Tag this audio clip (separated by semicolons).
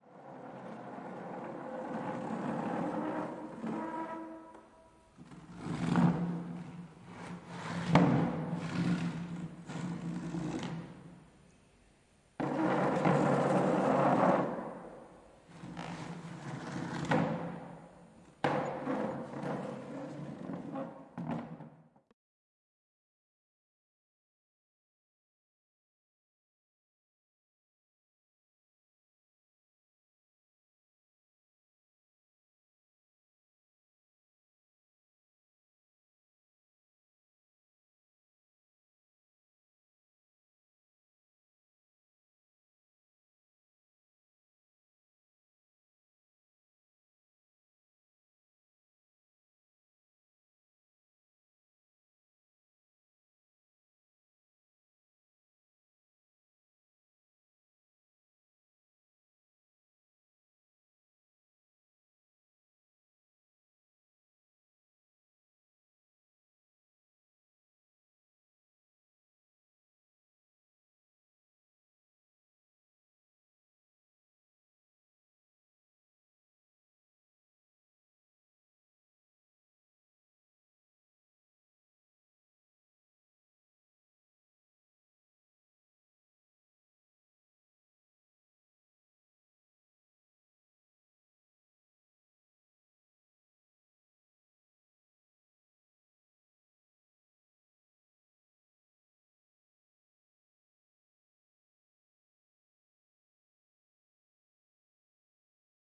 nature; parking-lot